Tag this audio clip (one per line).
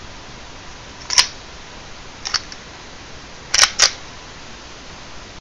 clip
gun
rifle
weapon
reload